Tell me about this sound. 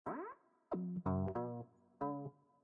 A Hot Guitar recorded at 93bpm.